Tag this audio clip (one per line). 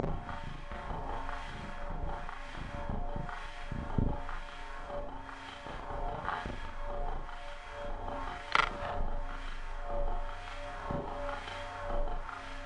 noise
ambient
field
sample
recording